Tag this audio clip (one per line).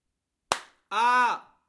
aaa
delta
ieak
sream